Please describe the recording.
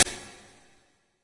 cooledit mediumemptyroom
2nd set of impulse responses created in Cool Edit 96 with the "echo", "delay", "echo chamber", and "reverb" effect presets. I created a quick burst of white noise and then applied the effects. I normalized them under 0db so you may want to normalize hotter if you want.
ir, convolution, presets, cool, vintage, edit, response, free, impulse, reverb, 96